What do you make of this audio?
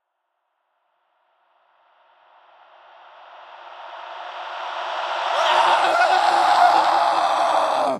brul revers reverb
reversed, scream